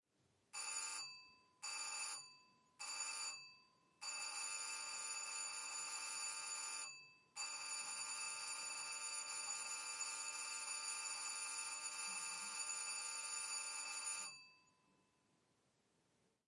Doorbell ringing - Far1 [d15]
An old doorbell ringing, distant perspective. Recorded in an apartment with Zoom H4n Pro.
doorbell, far, INT, old, ringing